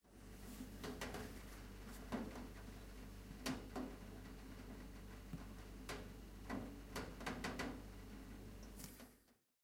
Raindrops on window sill 1 (short)
nature, raining, drip, weather, droplets, dripping, raindrops, rain, ambience, windowsill, drops, window